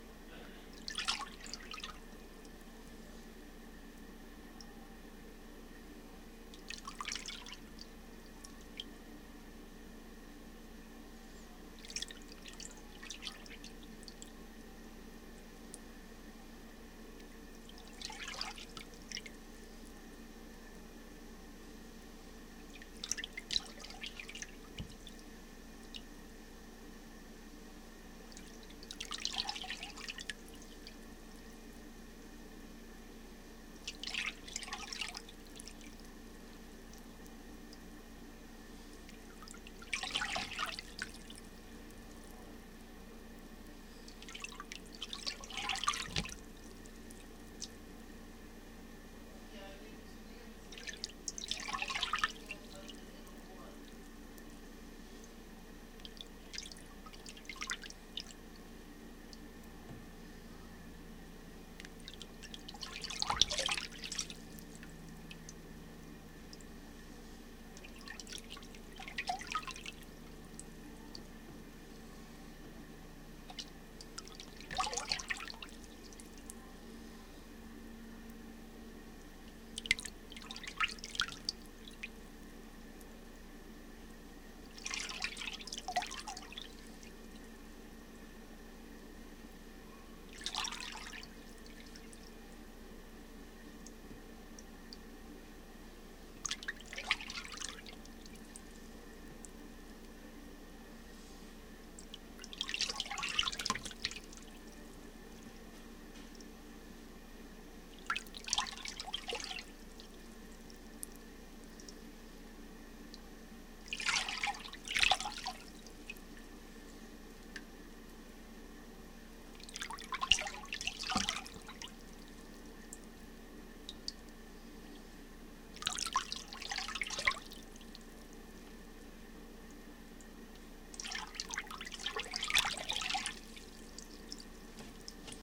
Recording of a paddling sound done in a plastic utility sink. Because the sound is very quiet, there is a moderate amount of noise. Sounds very good when played quietly with reverb. Originally recorded for use in a play.